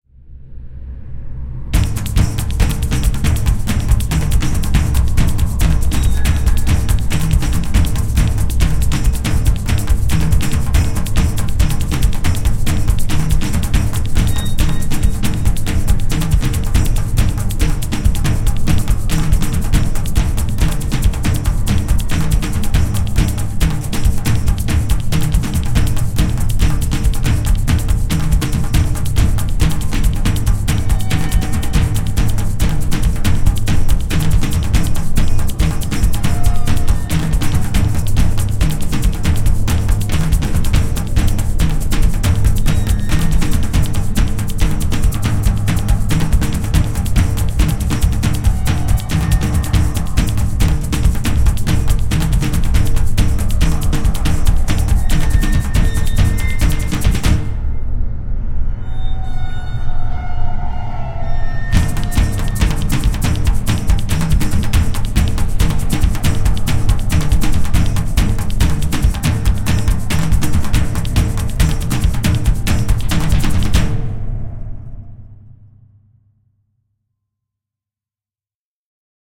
Tribal Style Drums
A "tribal" style drum track with waterphone and various FX that could be used for chase scenes, remixing, building tension, etc.
GEAR: Tama kit, Russian spoons, and various percussion instruments.
TEMPO: 140 BPM (tracked to a click, but not pushed to the grid)
TIME SIGNATURE: 7/4
140-bpm, 4, 7, ADPP, beat, chase, drum, drums, horror, jungle, pagan, percussion, polyrhythm, rhythm, ritual, syncopated, tension, tribal, voodoo, witchcraft